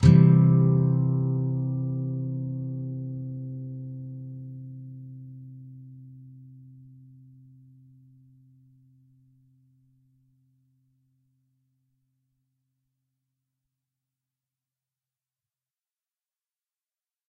C Major. E (6th) string 8th fret, A (5th) string 7th fret, D (4th) string 5th fret. If any of these samples have any errors or faults, please tell me.
bar-chords, chords, guitar, nylon-guitar